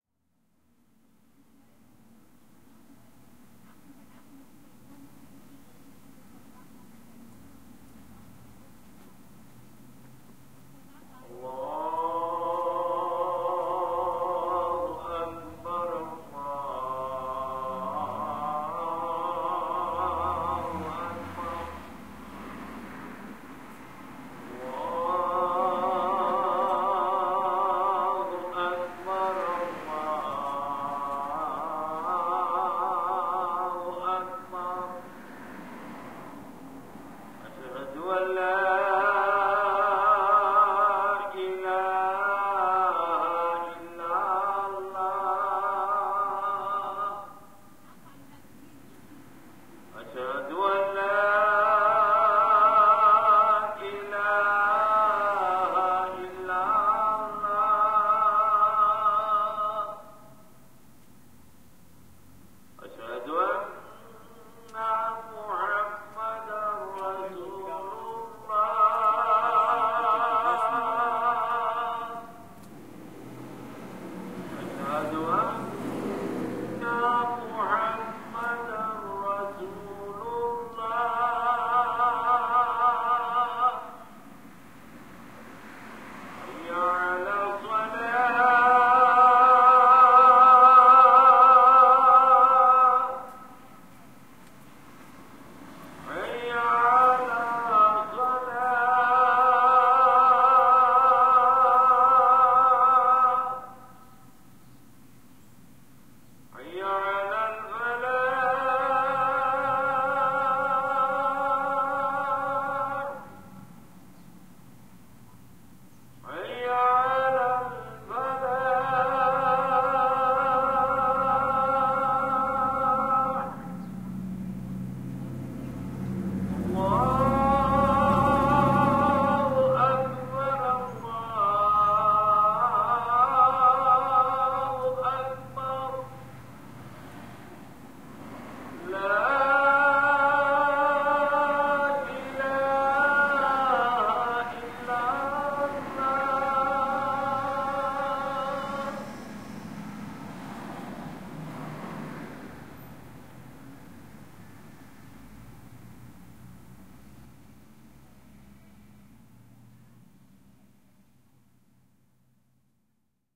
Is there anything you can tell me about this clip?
This is an unedited recording of Hamtramck, MI's Islam call to prayer which is played at several mosques throughout the city several times each day. This particular prayer was the Dhuhr which occurs around lunchtime and was taped near the intersection of Jos Campau and Caniff from only about 100 feet from the loudspeaker. I used a Crown SASS stereo mic plugged into my handheld Tascam Dr 07.